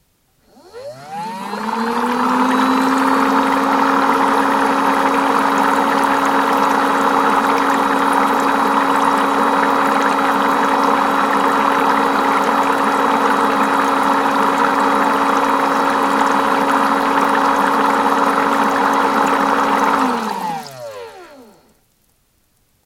An electric foot massager. It makes a lot of small bubbles in a shallow pan of water. The electric motor makes a whine. For this sample the unit was remotely turned on and off, so there is no switch noise.